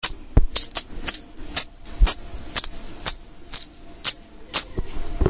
coins in my pocket as i walk
coin, penny, dropping, nickel, cash, currency, money, coins, cash-register, counting, change, quarter, dime